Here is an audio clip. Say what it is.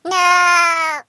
minion hit 1
This is one thing they could say if they are hit
Voice: "Nooooooo!"
silly, pain, scream, game, fun, hit, voice, funny, impact, wow, comic